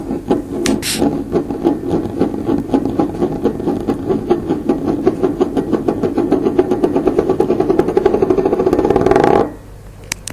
puodel letai
tea mug spinning